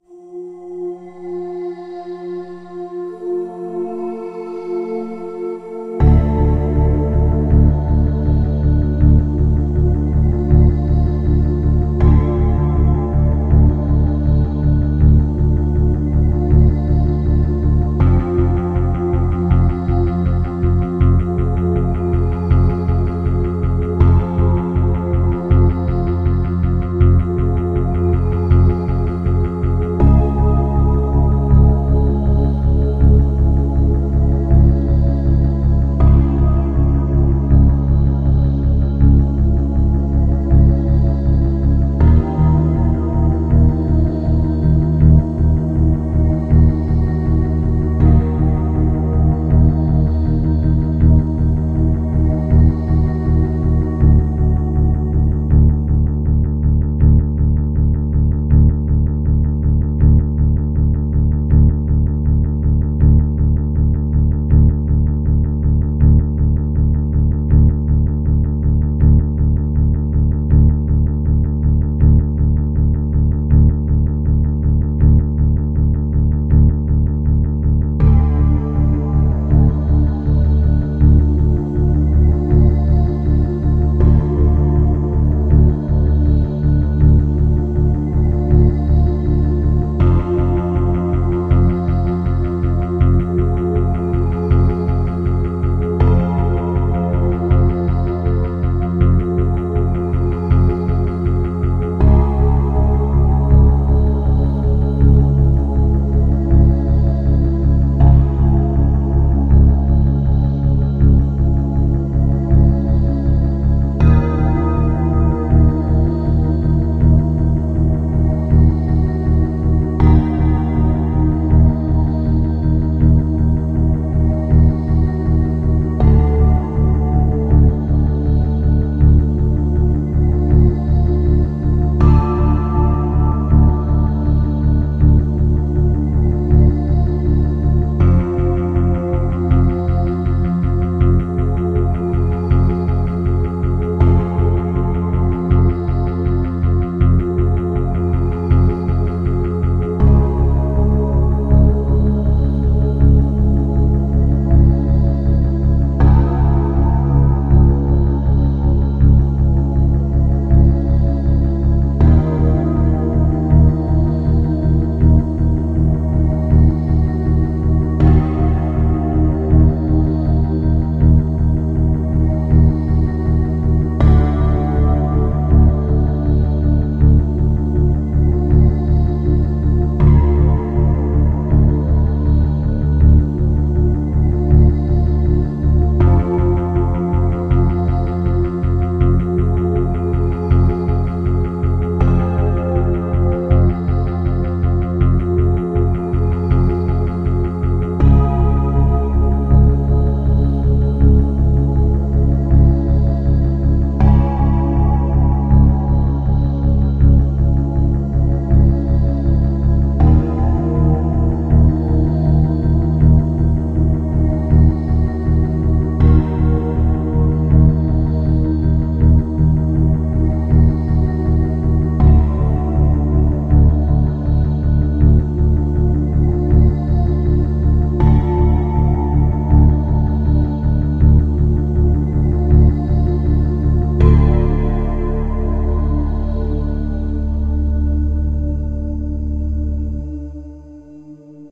Ambush (Suspense Music)
Made in LMMS Studio
Instruments: Ethereal Pad, Finger Bass, Drums, Brushes, Guitar, Cymbal.
CREEPY, TENSION